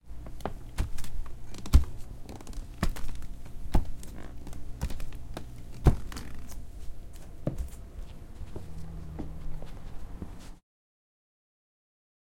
heavy barefoot on wood bip
Bare feet walking on a hardwood floor
footsteps, floor, bare, walking, hardwood